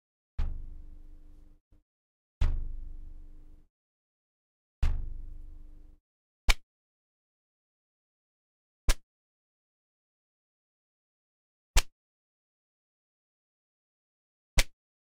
Rubber band being played by strumming and snapping it.
Band, OWI, Plucking, Rubber, Strumming